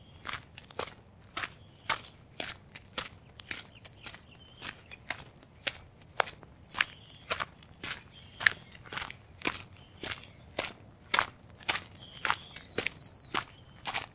Walking on a dusty road in San Jose Ca.
gamesound, cartoony, effects, walking, foley